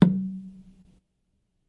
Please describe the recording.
Woodecho Tap
Wood tap echo recording live sample with finger strike
hit percussion drum echo live-sample rhythm percussive percs drum wooden-drum wood wooden-hit